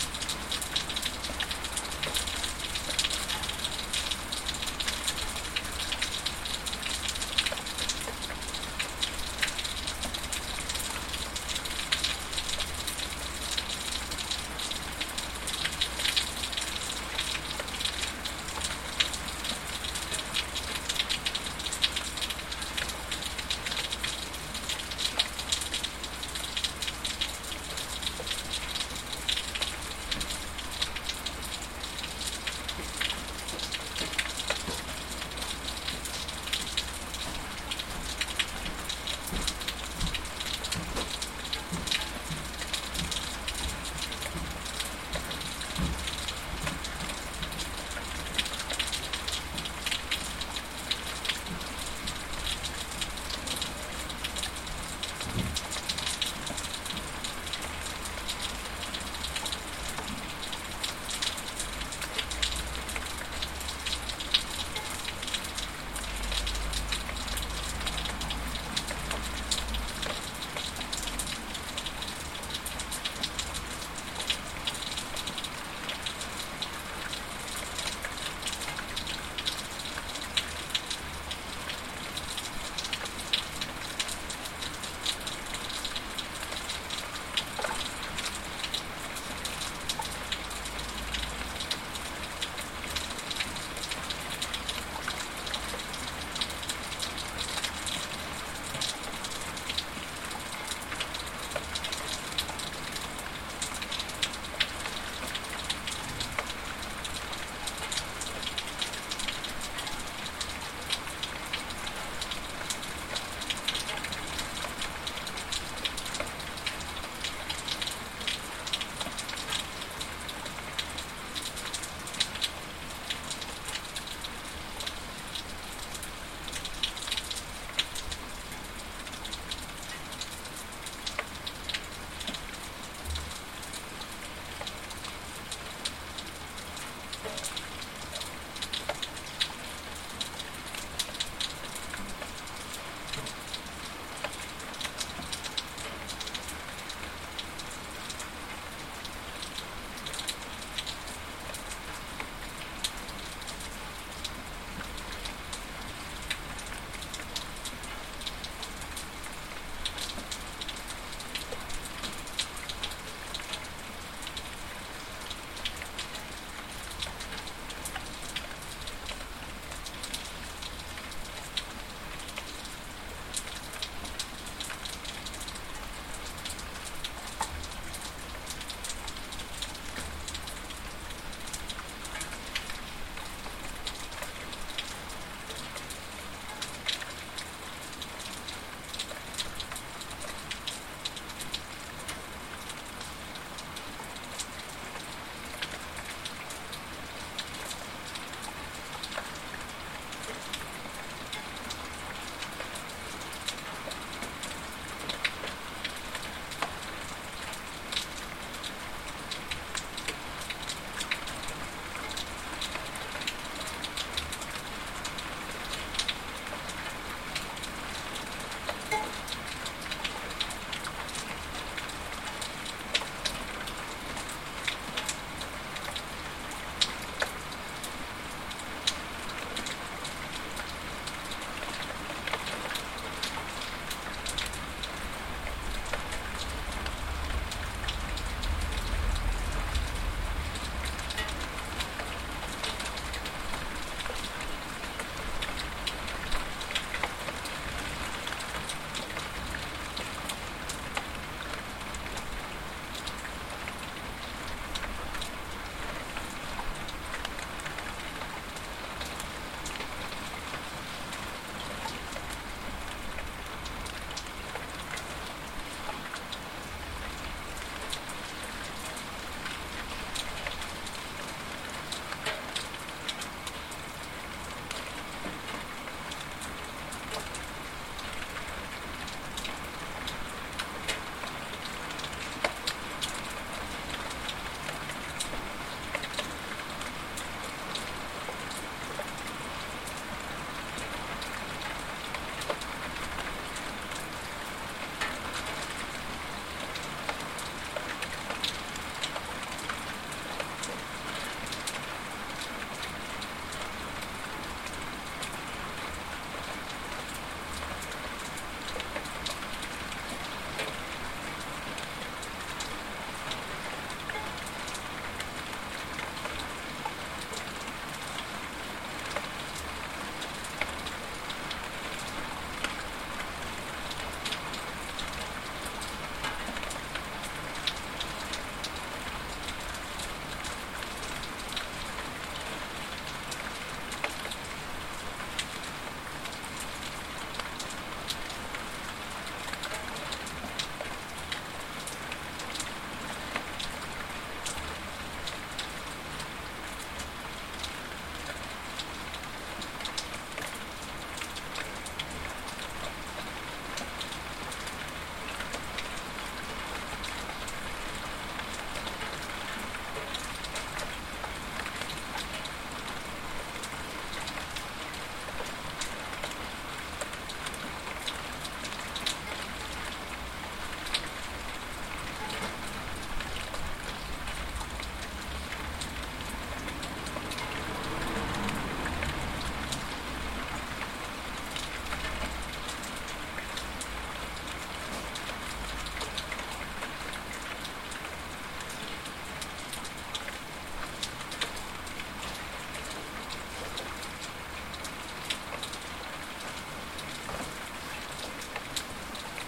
Rain during the night ambiance.